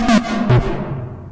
Some random FX Sounds // Dopefer A100 Modular System
a100, dopefer, fx, modular